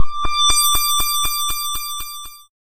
six sense01
animation, movie